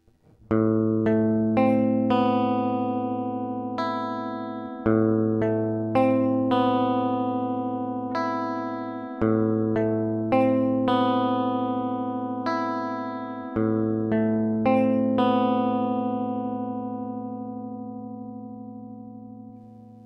This is some usefull guitar arpeggio what I was recorded on free time..